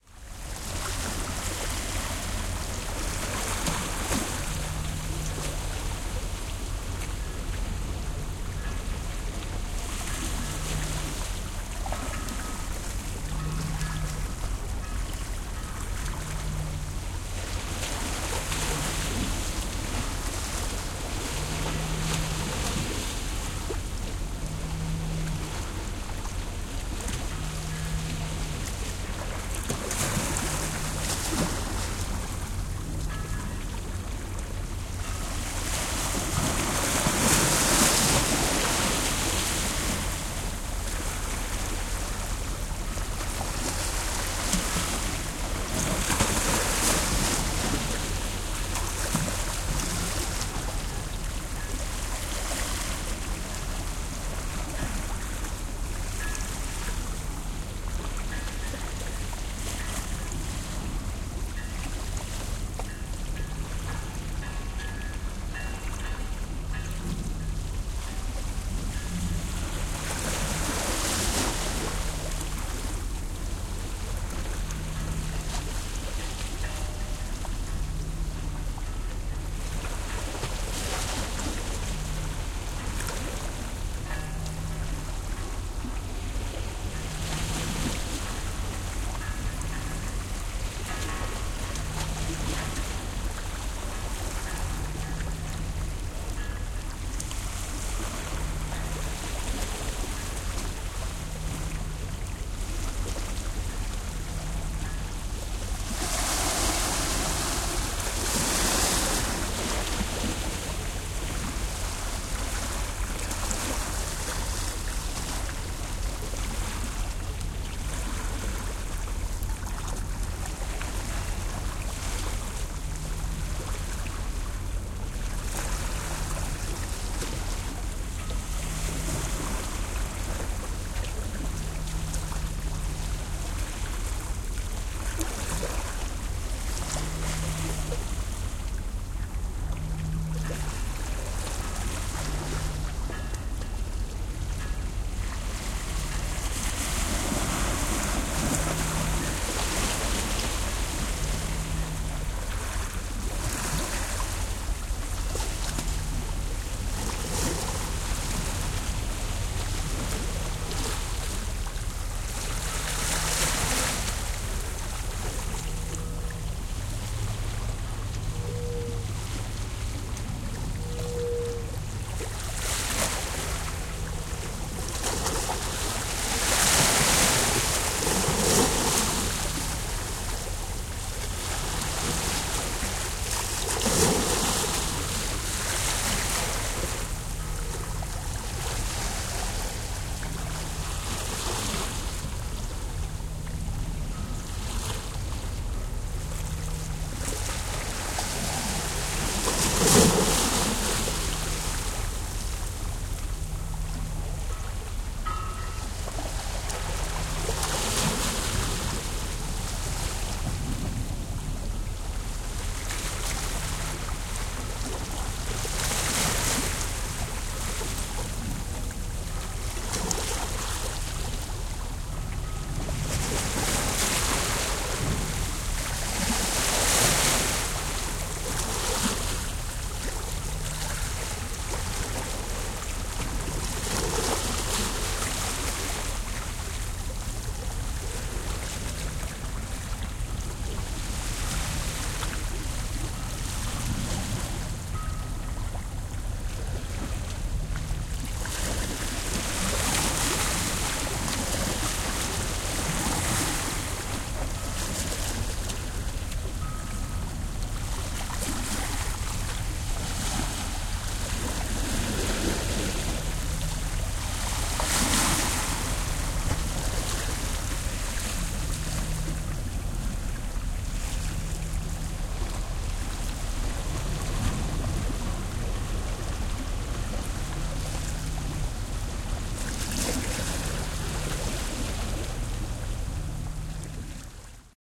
Waves med sloshy bell harbour sound low tide Saint John 190924
Light waves, bell and kind of humming from a bouy maybe a mile out, harbour. Stereo spaced EM172s.
bell, waves, harbour